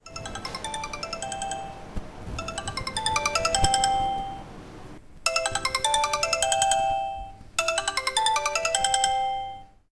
sound produced by the ringtone mobile phone in the library.